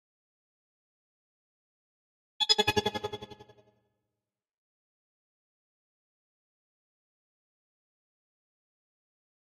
Electronic pulse/pick-up sound for games
computer, electronic, game, pulse